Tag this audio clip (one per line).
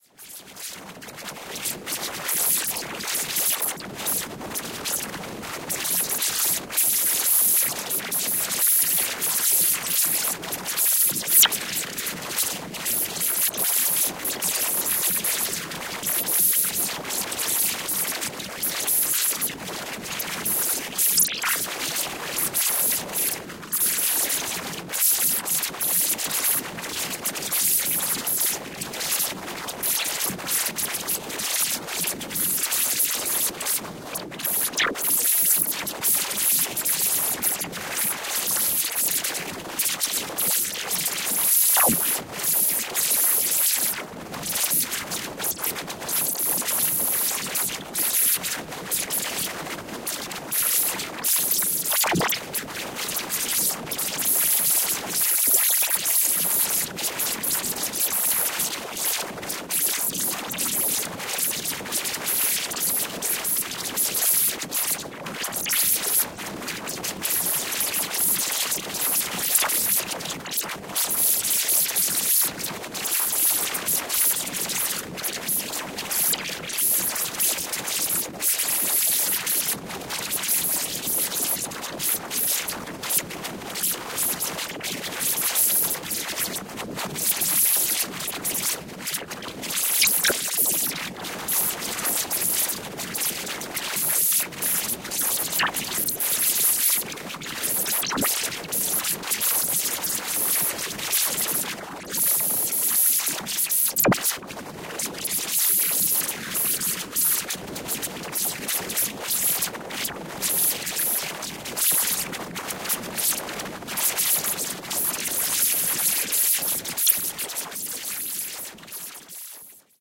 ambient
drone
reaktor
soundscape
wind